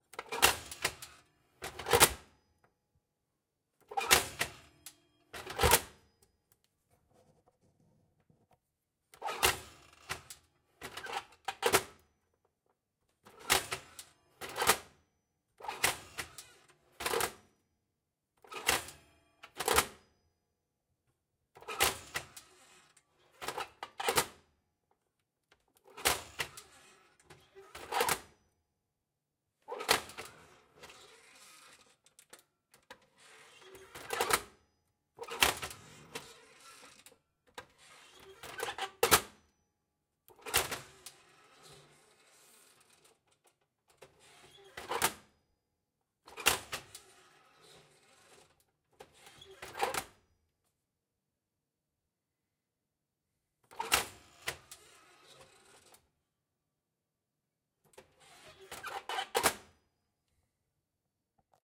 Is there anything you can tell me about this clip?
Old Electric Stove, Oven Door Open and Close, Slight Distance
Sounds recorded from an old electric stove, metal hinges, door and switches.
household, sound-effect, oven, door, stove, kitchen, fx, house, cooking, cook, sfx, metal, switch